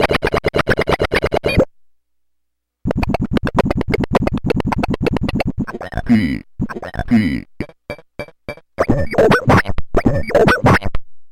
Loveing the Glitches
bending, circuit-bent, coleco, core, experimental, glitch, just-plain-mental, murderbreak, rythmic-distortion